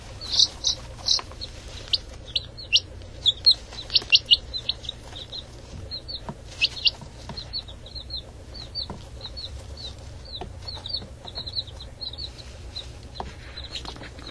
Chicks Peep
Bantam chicks chirping, recorded using an Olympus VN-6200PC digital voice recorder. This is an unedited file.